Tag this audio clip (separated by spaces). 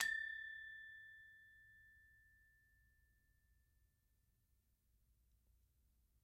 bali gamelan percussion